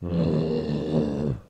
Zombie Roar 7
Recorded and edited for a zombie flash game.
zombie, roar, undead